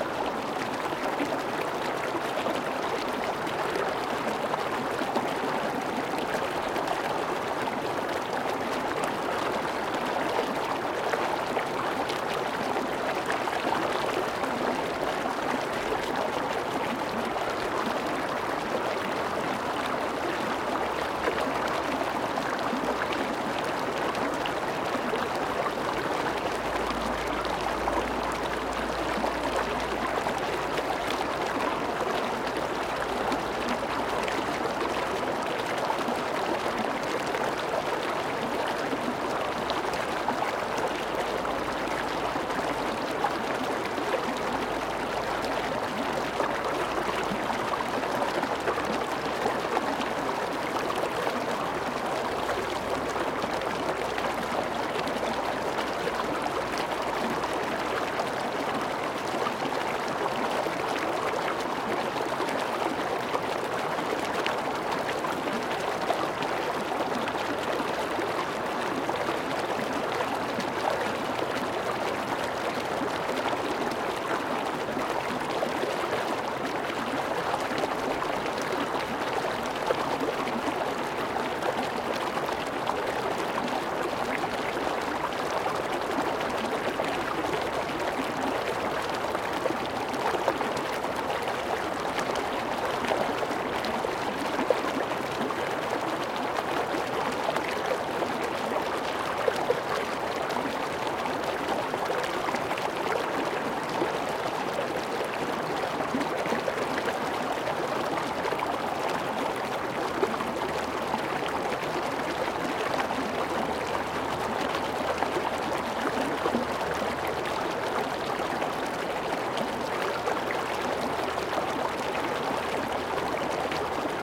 Sound of a small river, recorded with the Zoom H4n's stereo microphones